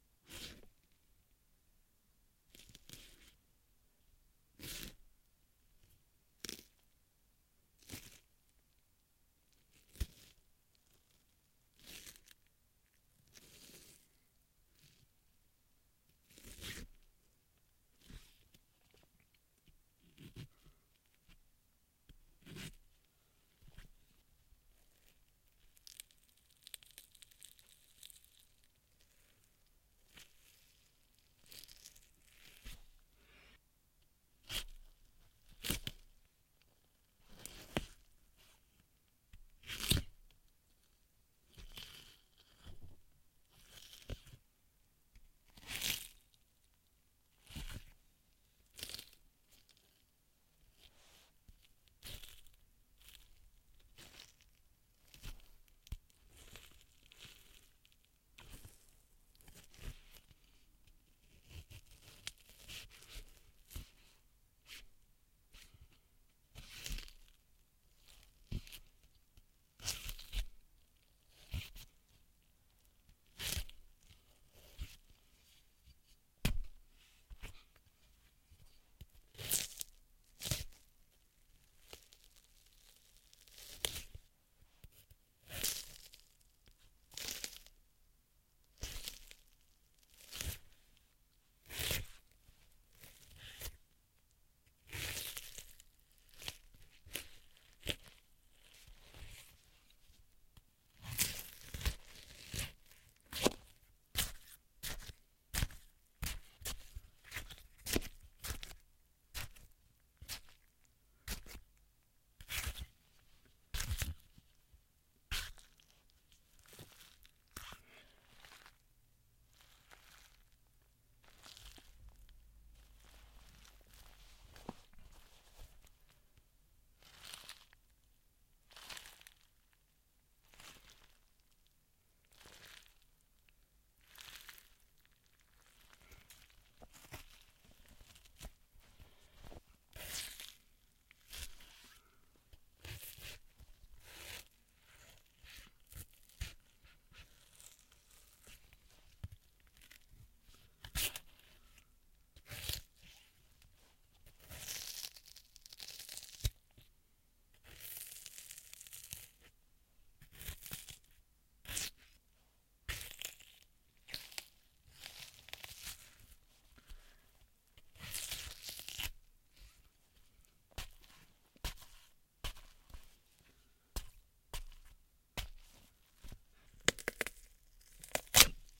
Melon Stabs (Juicy)
We stabbed some melons to make sound effects for someone being stabbed by a knife.
blood, bones, death, melon, splat, stomach